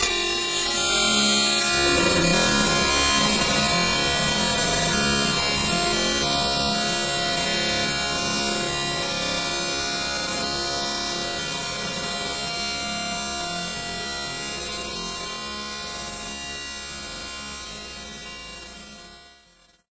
Electric Bowed Metal
A sound generated in u-he's software synthesizer Zebra, recorded to disc in Logic and processed in BIAS Peak.